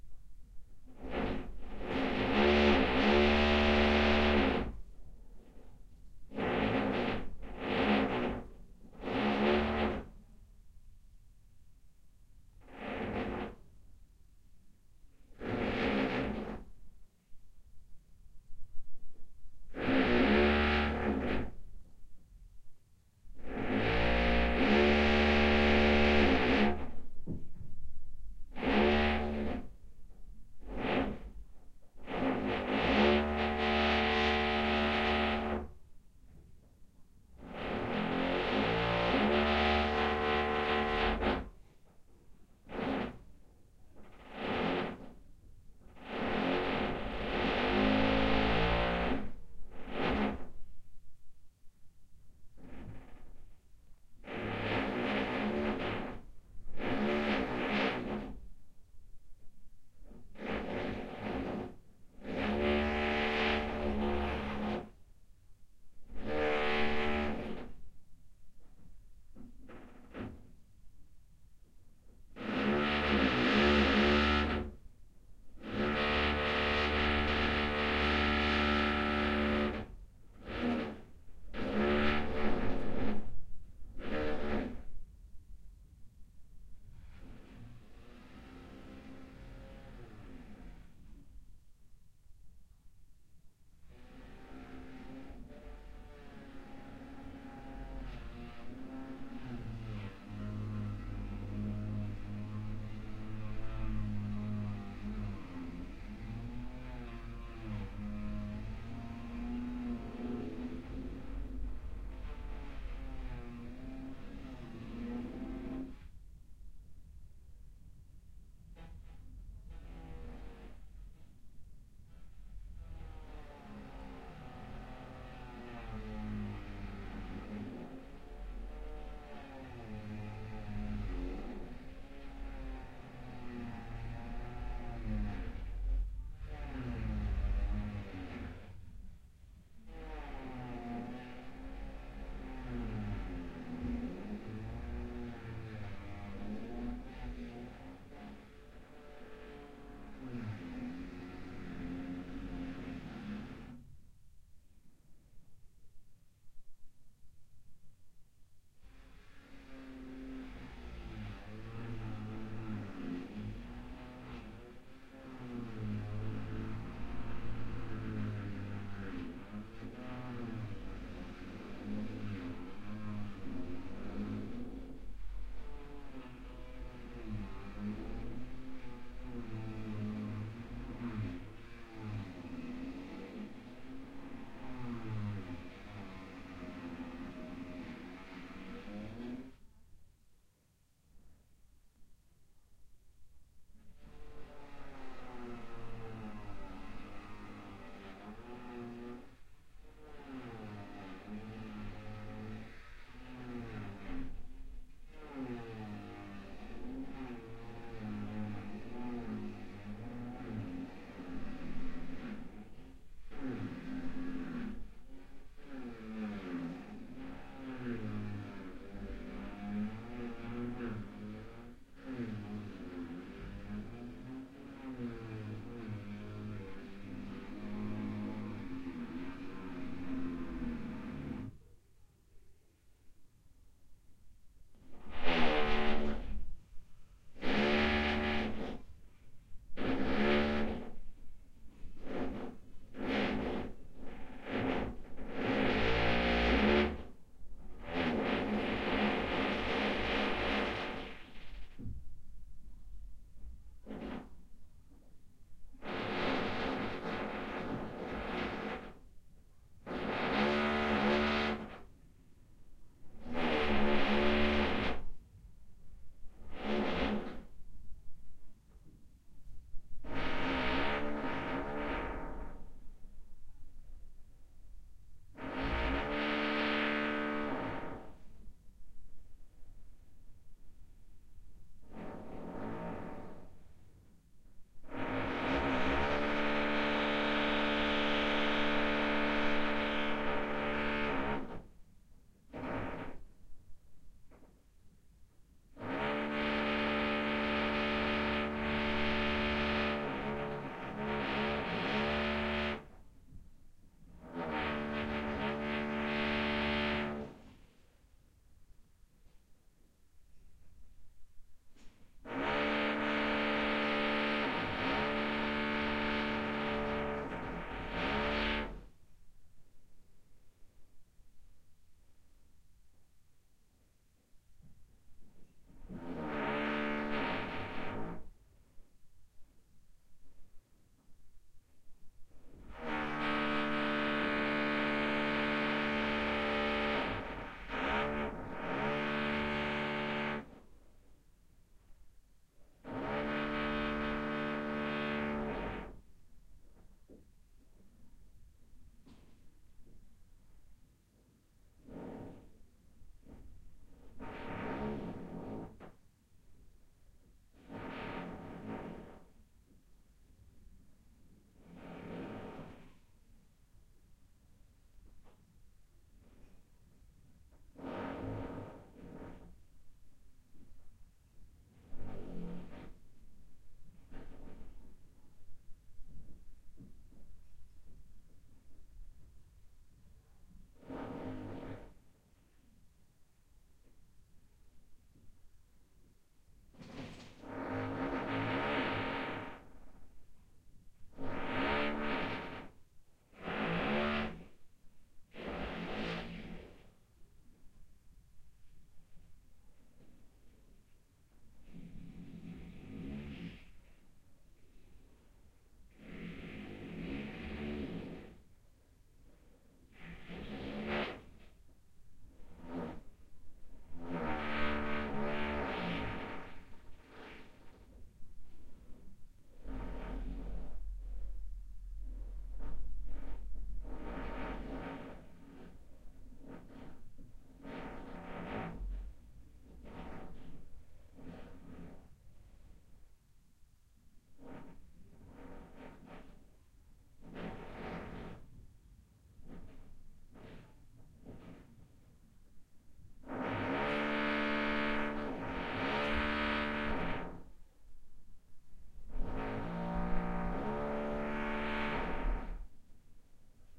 drilling neighbour
a drilling neighbor in a modern apartment building.
EM172->Battery Box-> PCM M10.
city, next-door, drill, noises, renovation, drilling, annoyance, annoying, renovating, room, neighbour, apartment, concrete, indoors, noise